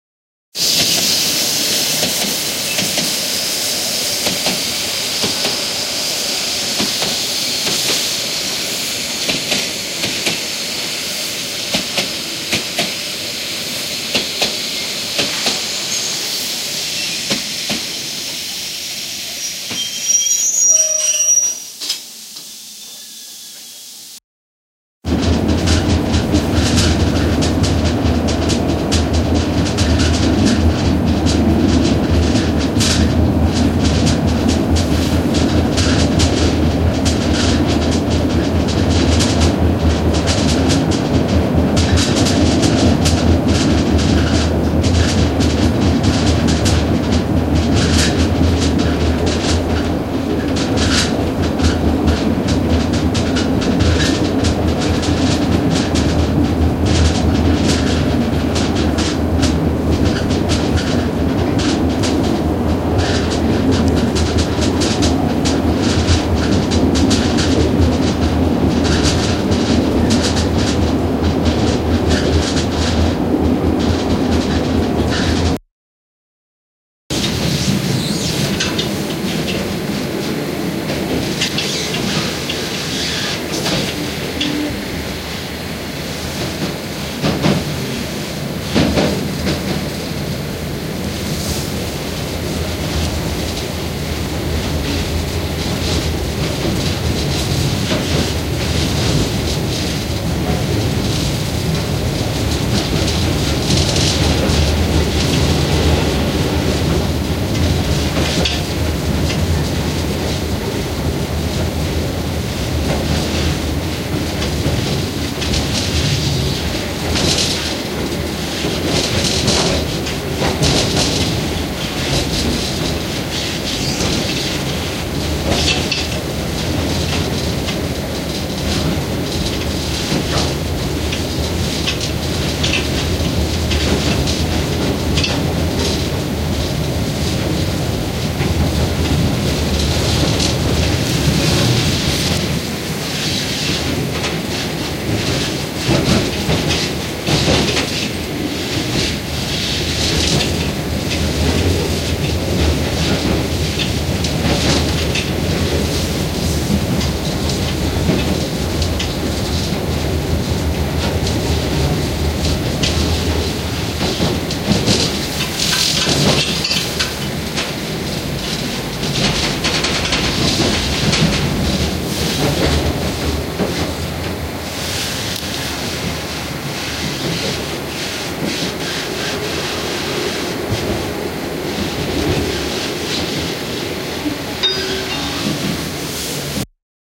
Sounds from a steam-train, squeaking and rattling inside with no additional passenger sounds (no one else was in that coach). The recording starts with the train approaching in the station and the squeaking of the brakes and the hiss of the steam. This particular train goes up and down the "Brocken" in the Harz, Germany.
Brocken, Brockenbahn, fieldrecording, Harz, locomotive, rail-road, rail-way, riding, steam, steam-train, train